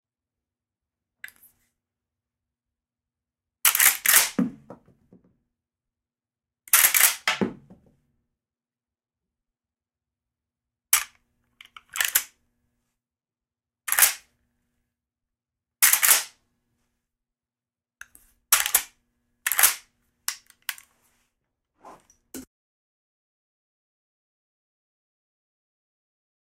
winchester 30-30 lever action EJECT
winchester lever action 30-30
eject rounds on floor